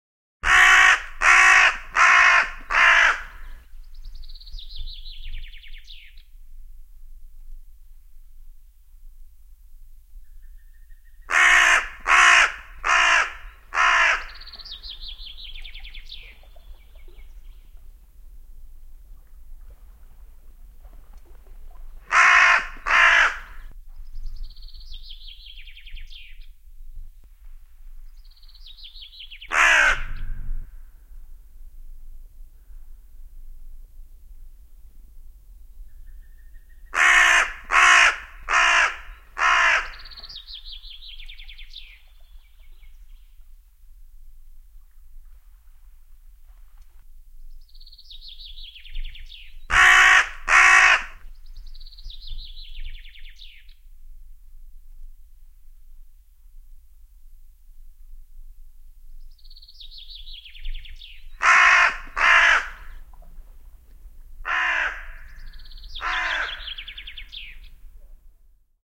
Varis raakkuu, vaakkuu / Crow, hooded crow cawing, chaffinch in the bg
Varis raakkuu voimakkaasti, taustalla peippo.
Paikka/Place: Suomi / Finland / Mikkeli / Anttola
Aika/Date: 19.05.1997
Field-Recording, Finnish-Broadcasting-Company, Finland, Birds, Lintu, Yle, Luonto, Nature, Bird, Linnut, Suomi, Soundfx, Tehosteet, Yleisradio